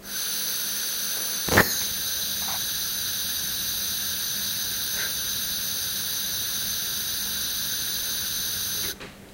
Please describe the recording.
gas that escapes from a stove is ignited with a lighter / gas saliendo de una cocina se enciende con un encendedor